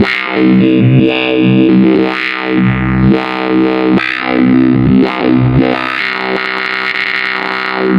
Electronic wah-wah drone
Wah-wah drone loop
fx, electronic, effect, loop, experimental, drone, wah-wah